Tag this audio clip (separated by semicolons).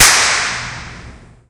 ir; impulse; reverb; response; convolution